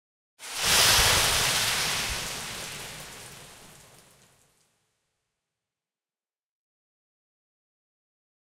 Water Puddle Splash
The artificial sound of a carwheel going through a rain puddle. Car sounds sold separately.
Could also be the sound of a single wave.
I made this just to try out a new compositing technique. More practice needed...
Its not perfect, but if you or anyone find it useful, I'll be extremely happy!
At least I get a participation ribbon... right? Right??
Personal note:
This is my first sound to pass 100 downloads. It might not be the world's most impressive milestone, but as someone who loves to manipulate, and play with sound, as well as give others the resources to create something creative of their own, it truly means alot to me. Knowing that over 100 people at this point, found my creation useful in some way, brings great joy to me. I wish you all good luck in your various exciting projects.
Take care, and stay creative.
(Milestone passed on 18th of May/2021)
car, ocean, wheel, waves, shore, beach, composite, puddle, splash, water, wave, rain, sea